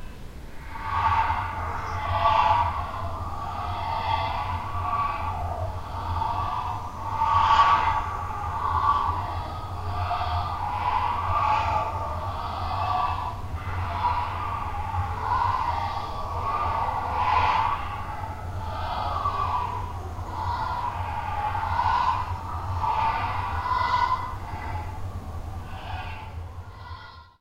Ghostly Ecco With mild hiss and hum
This Sound was created using old school practical sampling such as, slowly shaking a box of pins and recording the sound from a small hand held vacuum cleaner, Editing was done with simple echos and stretching of tones, whilst adding a bass hiss to the background.
These are the simple methods still used in modern day sound design not all sounds need to be entirely Computer generated, GO on have fun and try it yourself :) You would be surprised what a little imaginative mixing of the sounds around you can create :) Enjoy.
sinister, ghost, spectre, creepy, phantom